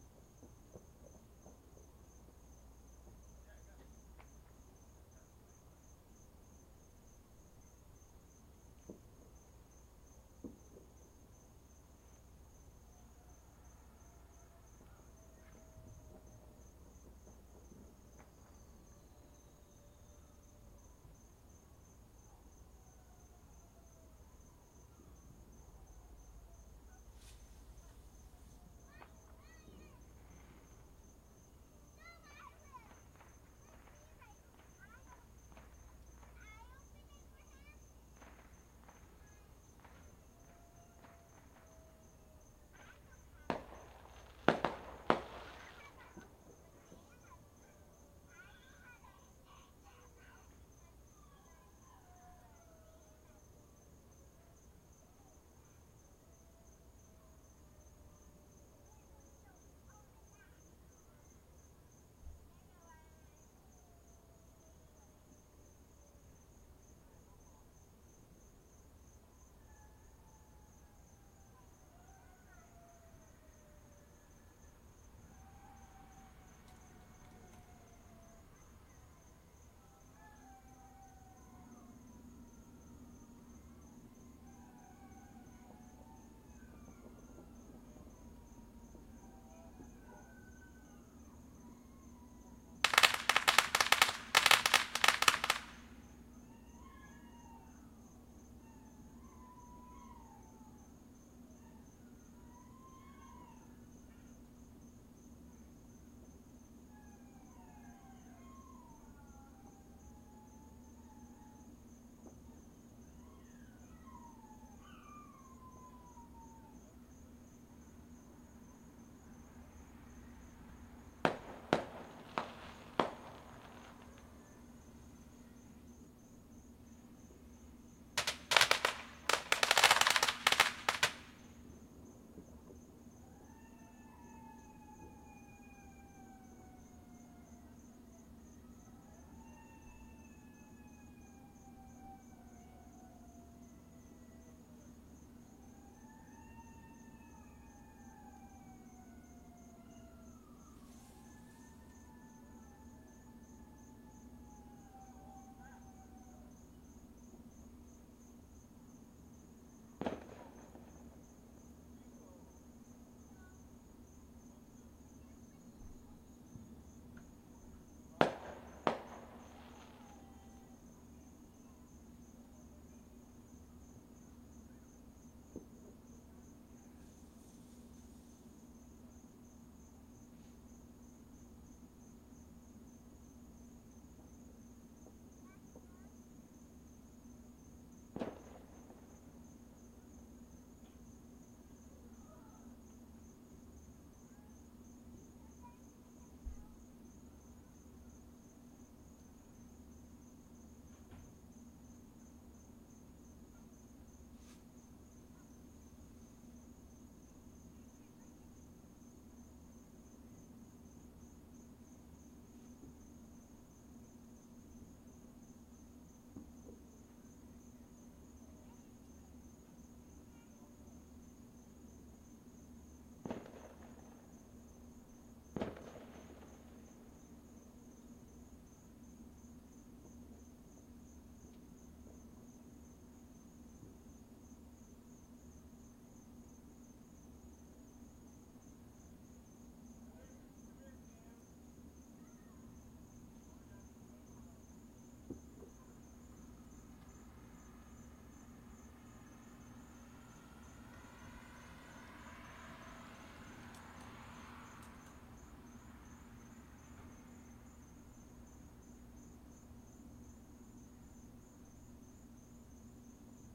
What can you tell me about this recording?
Fireworks recorded with laptop and USB microphone as things wind down even more with an occasional rocket or mortar.